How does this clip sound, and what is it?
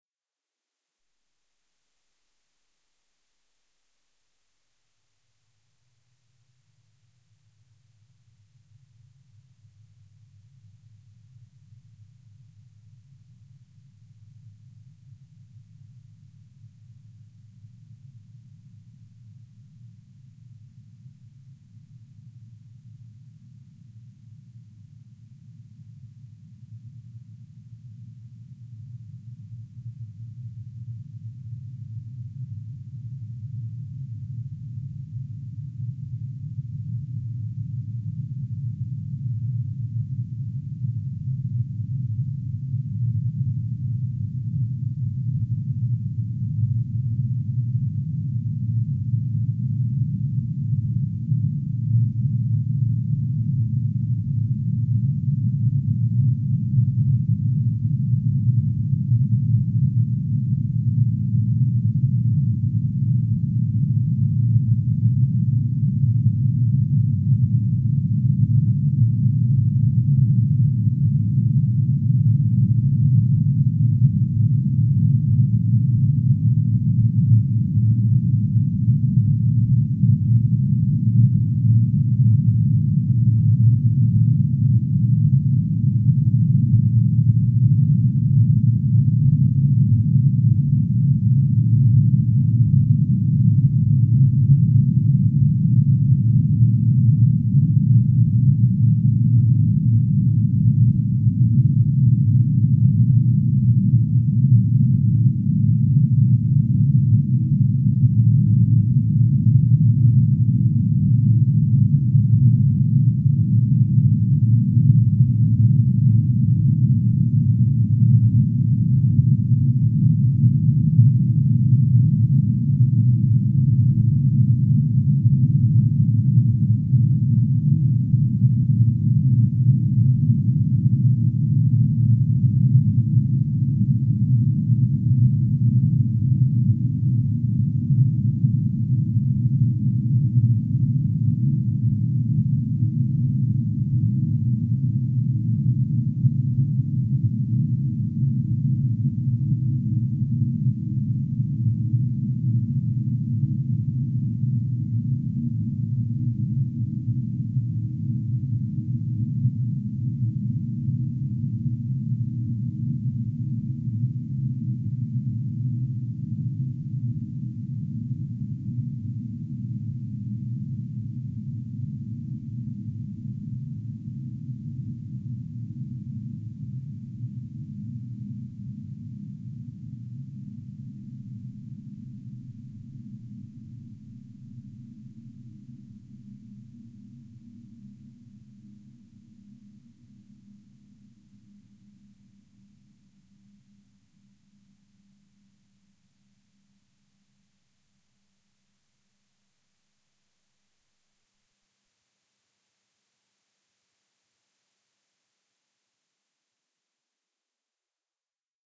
LAYERS 017 - MOTORCYCLE DOOM-128
LAYERS 017 - MOTORCYCLE DOOM is a multisample package, this time not containing every single sound of the keyboard, but only the C-keys and the highest one. I only added those sounds because there is very little variation between the sounds if I would upload every key. The process of creating this sound was quite complicated. I tool 3 self made motorcycle recordings (one of 60 seconds, one of 30 seconds and the final one of 26 seconds), spread them across every possible key within NI Kontakt 4 using Tone Machine 2 with a different speed settings: the 1 minute recording got a 50% speed setting, while the other 2 received a 25% setting. I mixed the 3 layers with equal volume and then added 3 convolution reverbs in sequence, each time with the original motorcycle recordings as convolution source. The result is a low frequency drone like sound which builds up slowly and fades away in a subtle slow way. I used this multisample as base for LAYERS 017 - MOTORCYCLE DOOM 2
drone, low-frequency, menacing, multisample